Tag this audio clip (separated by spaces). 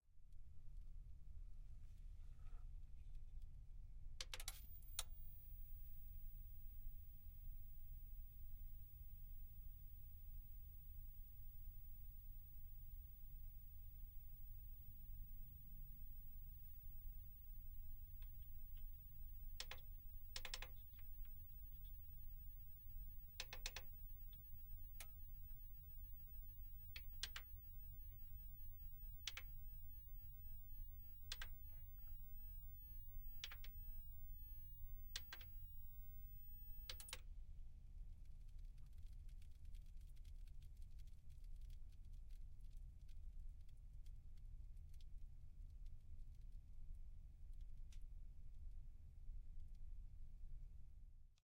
buzzing cooling Crackle Monitor Off On Screen Tube Turn TV Vacuum